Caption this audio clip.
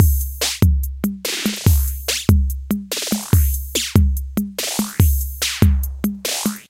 TR-606 (Modified) - Series 1 - Beat 02
Beats recorded from my modified Roland TR-606 analog drummachine
Analog, TR-606, Electronic, Drum, Beats